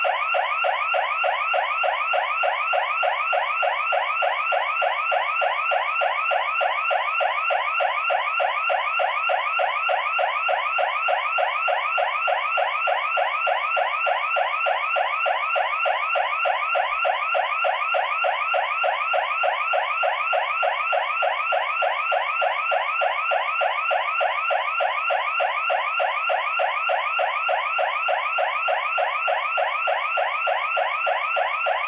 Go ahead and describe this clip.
alarm house security cu
alarm, close, house, security